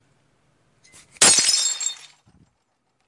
Glass broken
broken, cup